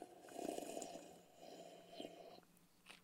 sipping coffee out of a cup